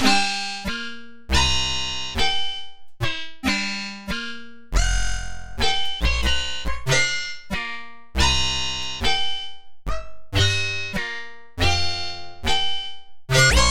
-140 Dried Fungas bass loop dry
futuristic wasteland instrument
bass, distorted, dusty, hard, melody, phase, progression, radiated, western